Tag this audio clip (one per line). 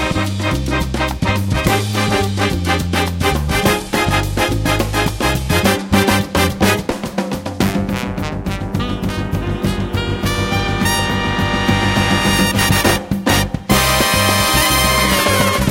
vintage
riser
latin
edm